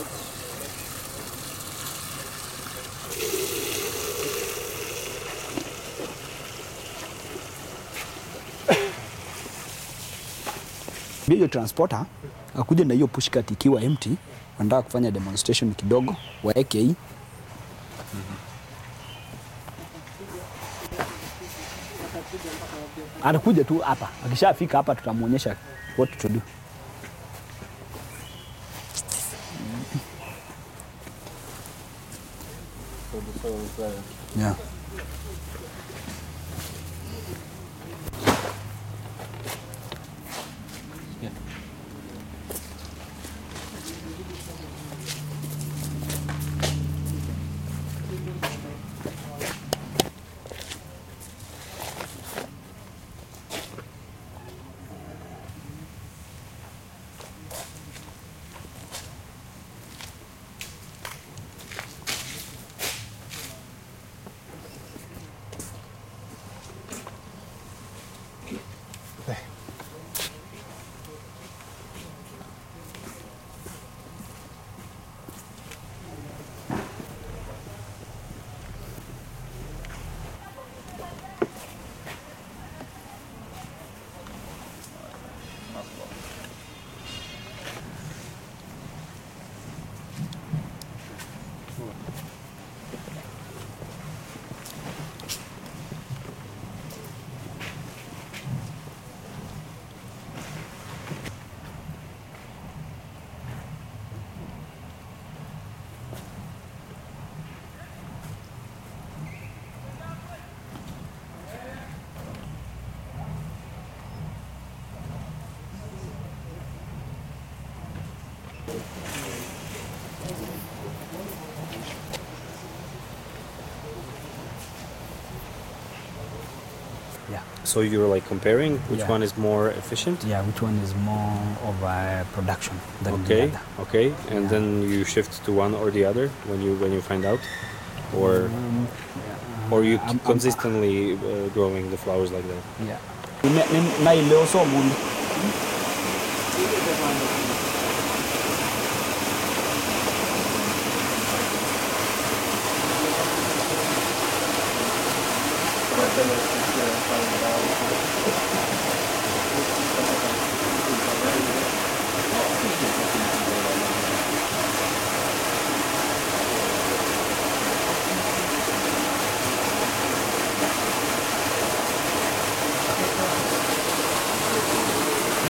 dec2016 Naiwasha Lake flower greehouse ambient Kenya
Kenya, Naiwasha Lake, flower greehouse, ambients
Africa, ambient, flower, greehouse, Kenya, Lake, Naiwasha